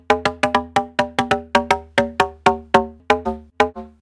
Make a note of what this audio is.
Pipe-Drum
Recorded plastic sectioned pipe unfolding. Nice rhythmic percussion.
percussion, pipe, pipe-sound, plastic, plastic-pipie-sound, rythm